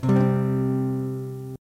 Yamaha F160e Acoustic Electric run through a PO XT Live. Random chord strum. Clean channel/ Bypass Effects.
acoustic, chord, strum, guitar